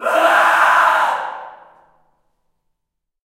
Male screaming in a reverberant hall.
Recorded with:
Zoom H4n